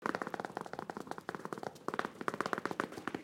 PASOS RAPIDOS
pasos, rapido, velocidad